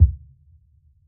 a bassy piano lid closing